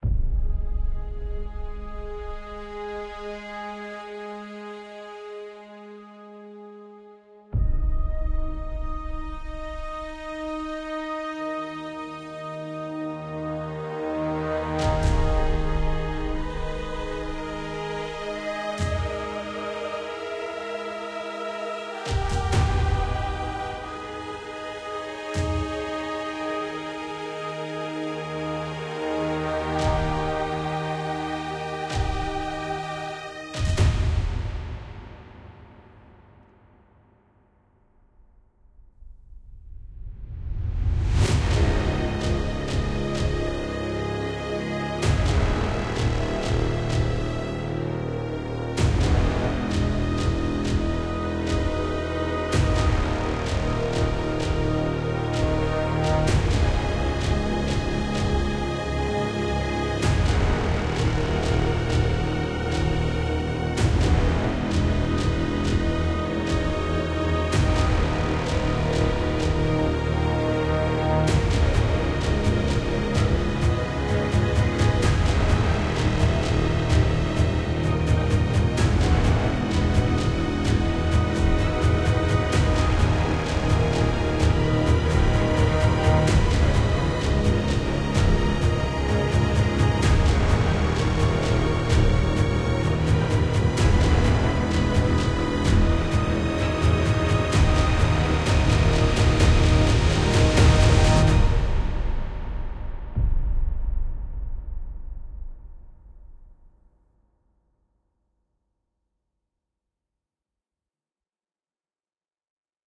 Cinematic Music-07
Cinematic, Film